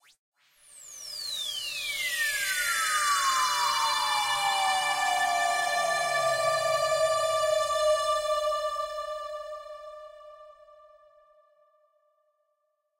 Flo fx iv
made with an access virus ti
fx, synth